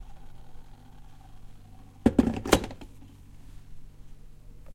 položení konvice
Pokládání konvice na základnu.
boiling, hot, kitchen, water